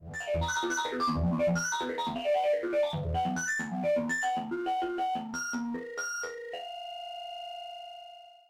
Retro Melodic Tune 25 Sound
Robotic retro tune.
Thank you for the effort.